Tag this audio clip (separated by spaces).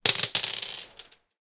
misc noise dice ambient